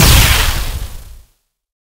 A synthesized laser shot sound to be used in sci-fi games. Useful for all kind of futuristic high tech weapons.
futuristic, game, gamedev, gamedeveloping, games, gaming, high-tech, indiedev, indiegamedev, laser, lazer, photon-cannon, science-fiction, sci-fi, sfx, spacegun, video-game, videogames